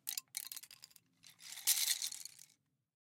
Pencils Falling on the Floor
Various wooden pencils being dropped on the floor
pencils, 5naudio17, floor, uam, pencil, falling